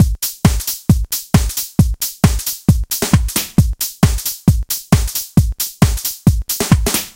BB-HouseBeat-134BPM
Using "House" samples in Battery 2 I programmed this very basic 4 bar drum loop. (134 BPM if you haven't already seen tags / file name :)
house-beat, drums, bpm